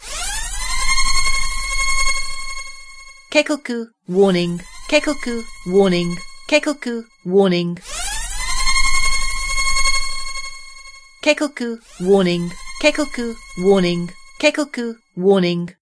Air-raid klaxon with Japanese (Keikoku) and English warning.
SofT Hear the Quality